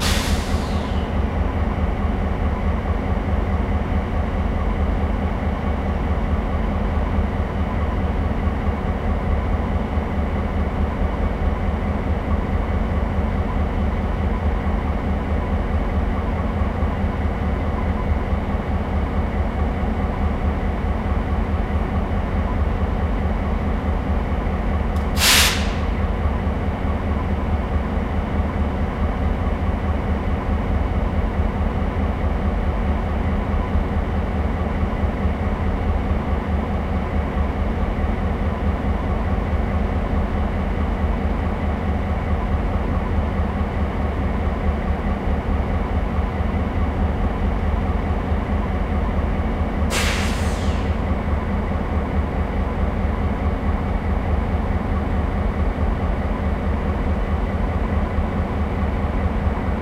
three diesel locomotives idling loop1
Stereo recording of three linked diesel locomotives idling in the train yard. Captured at an angle to the lead engine, with the others muffled by work sheds. Recorded with a Zoom H1, mastered in Sound Forge 5. EQ'ed to reduce low-end rumble and edited for easy looping.
locomotive, compressed-air, field-recording, diesel